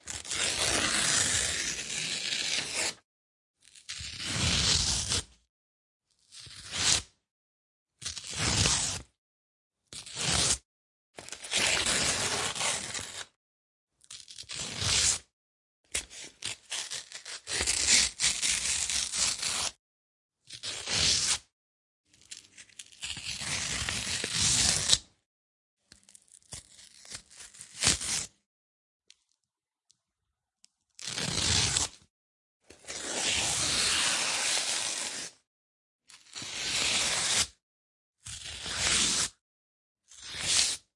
PAPRRip -gs-
Ripping, tearing paper
Recorded on t.bone EM-700 stereo pair microphones into Zoom H4n Pro Black.
paper, paper-rip, paper-tear, paper-tears, rip, ripping-paper, tear, ucs, universal-category-system